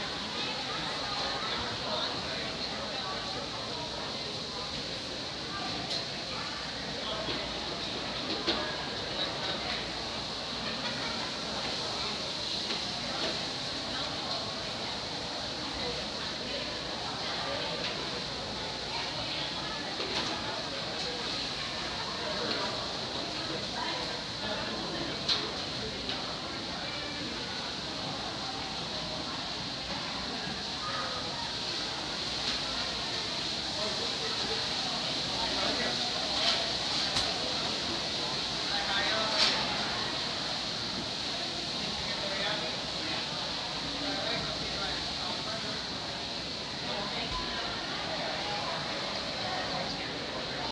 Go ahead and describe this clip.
West Towne Mall ambiance 1
talking, background-sound, field-recording, mall, americana, Wisconsin